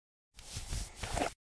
picking up a gun.